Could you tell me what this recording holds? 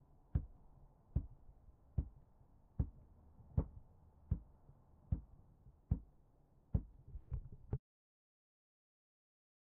walking soft ground
walking on soft ground